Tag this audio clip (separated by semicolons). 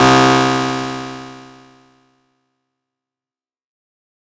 110; acid; blip; bounce; bpm; club; dance; dark; dub-step; effect; electro; electronic; glitch; glitch-hop; hardcore; house; lead; noise; porn-core; processed; random; rave; resonance; sci-fi; sound; synth; synthesizer; techno; trance